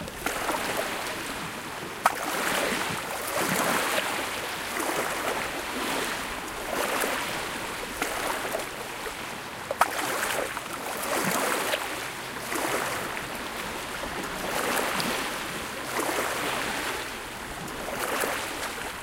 High quality stereo seamless sound of natural sea wave.
relax, wave, seamless, sea, loop, water